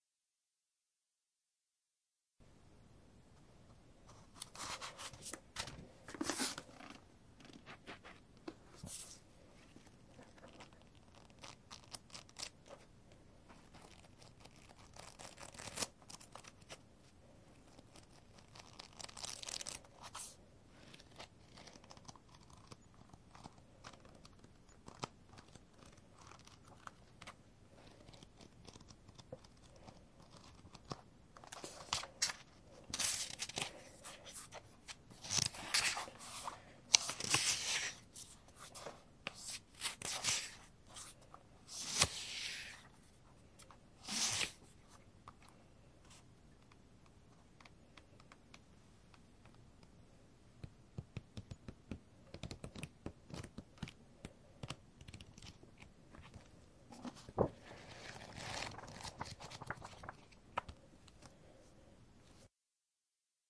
flipping through and turning pages in a book